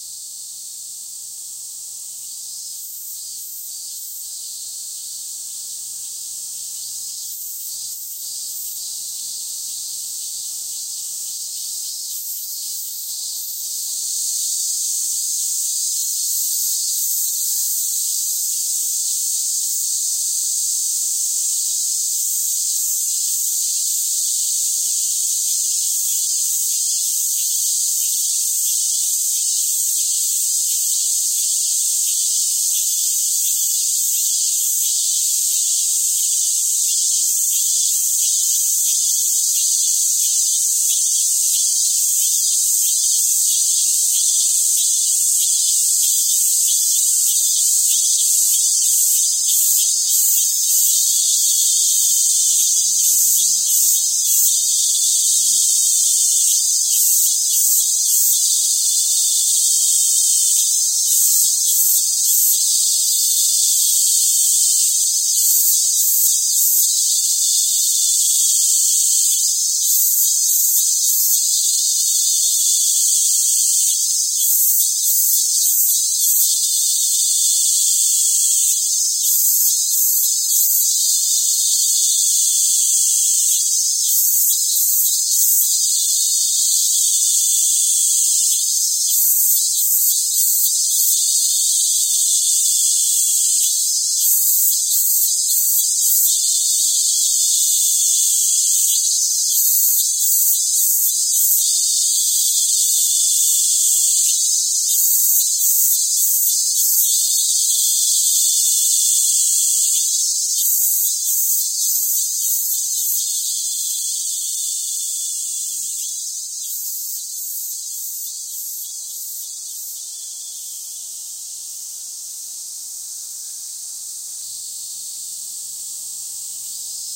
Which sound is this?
cicada mixdown
Every summer I try to record the cicadas. They are the sound of summer in Japan.
But they've be so difficult to record.
But this is my best attempt so far.
I used 2 two-channel mics and mixed everything down to stereo. The only editing I did was EQ, I took out the low end because... who needs that? lol
Enjoy!
ambience, cicada, field-recording, japan, sound, summer, tokyo